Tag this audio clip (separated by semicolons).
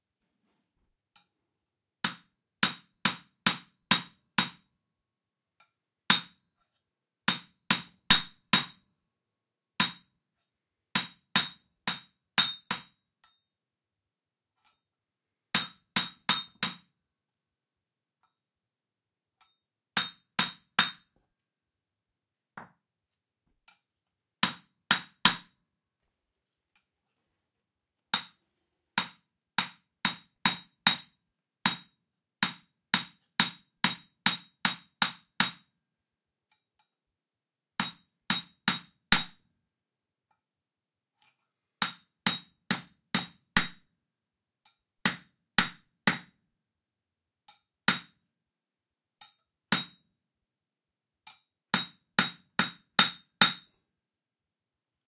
anvil; banging; copper-hammer; hammer; metal; smithy; stereo; tapping; tool-steel; xy